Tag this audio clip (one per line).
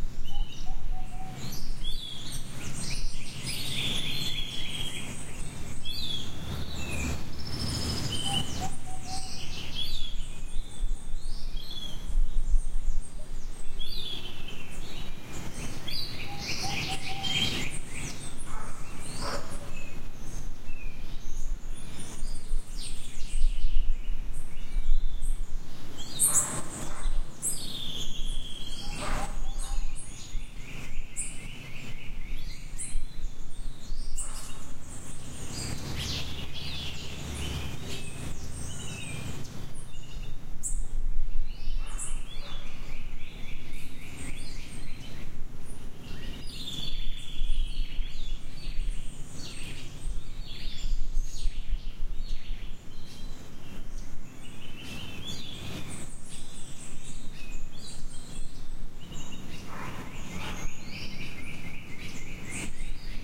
ambient
America
birds
Central
Costa
environment
field-recording
insects
jungle
monkeys
Rica